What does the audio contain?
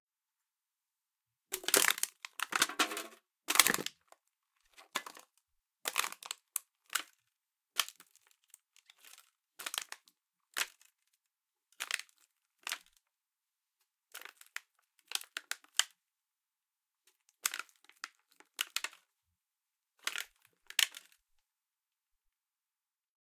Stepping on a Can: Can crushed, and repeatedly stepped on.
tin, crush, Can, metal, crackling, step, OWI